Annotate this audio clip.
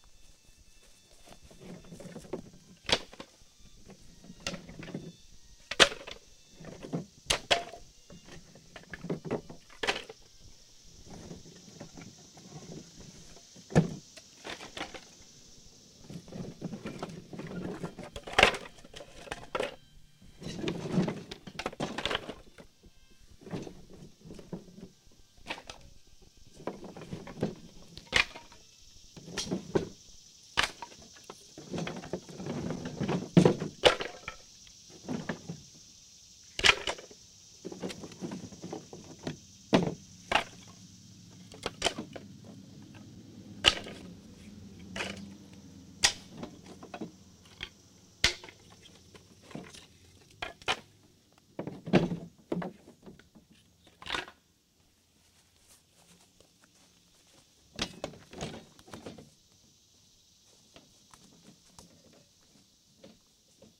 Moving fire wood from a wheelbarrow to a woodpile. Small pieces, mostly scrap firewood - not logs. Cicadas can be heard in the background.